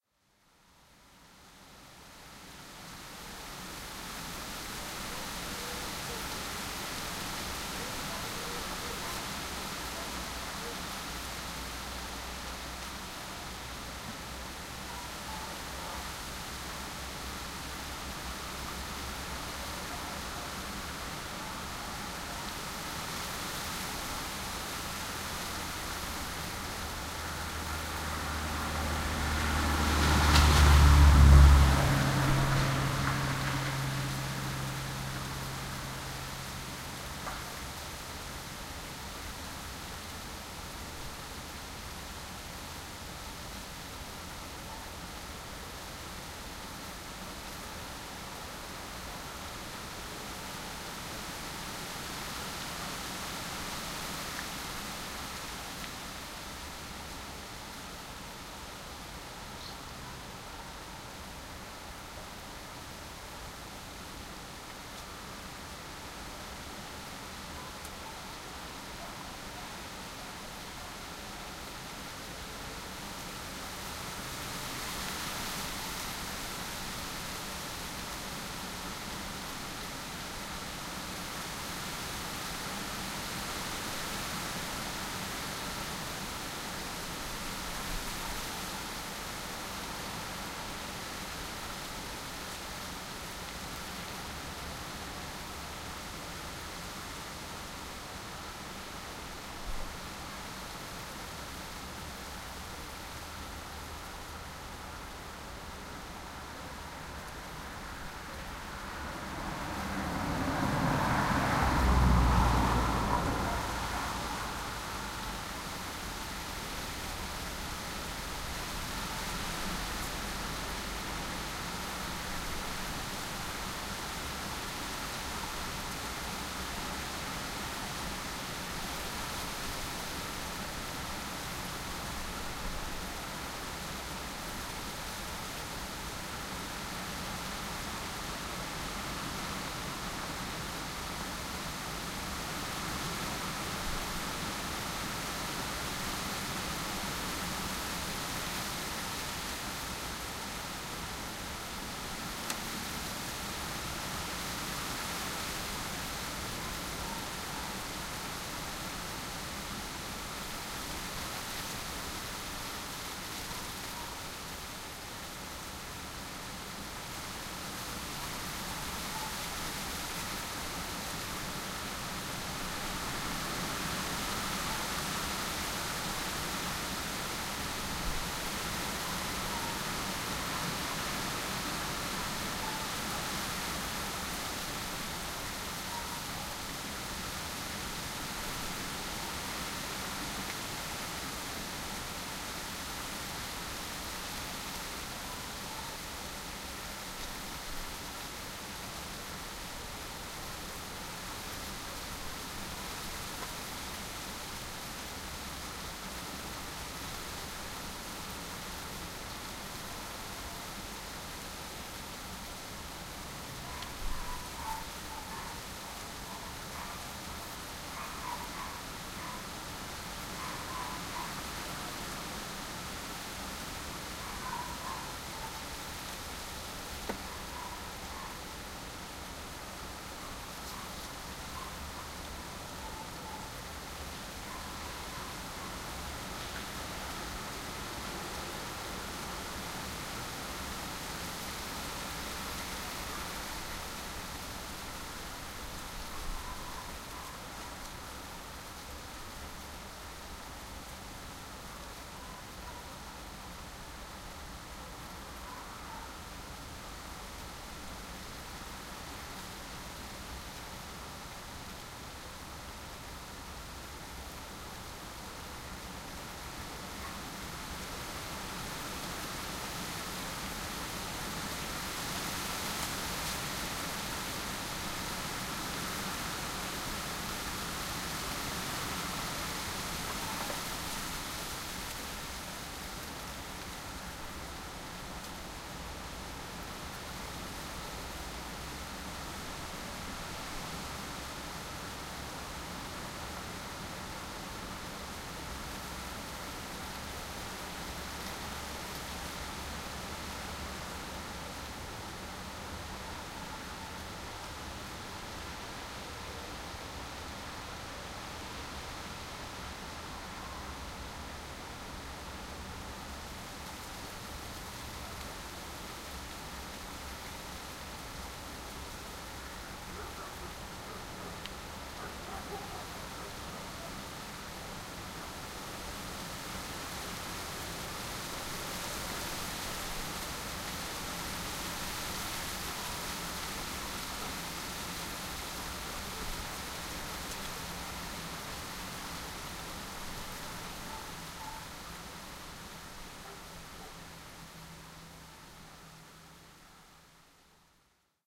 windy lane
August 28, 2006. A windy day, I decided to drive out to a local road near where I live. Managed to catch the sound of the wind in the trees, dogs barking at kennels quite a way away and a few cars passing me too. Also a cyclist, but this is faint so listen carefully :o) There had been some heavy rain just before I recorded, and bird song is almost, (strangely), absent... Recorded Maplin Mic > Sony MD > Audacity.
barking, bird-song, countryside, distant, field-recording, lane, leaves, rustle, traffic, weather, windy